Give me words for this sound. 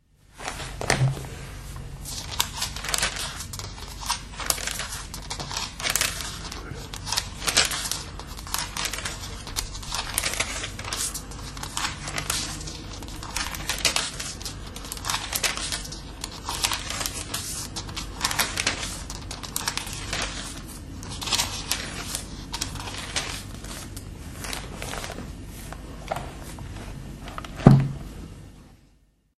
Turning the pages of the book 2nd Samuel in the bible (dutch translation) the church has given my father in 1942. A few years later my father lost his religion. I haven't found it yet.
book, paper